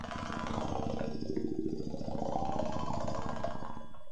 A predator like sound I made with my mouth, slowed it by 50% and than added reverb effect.
predator, beast, snarl, growl, monster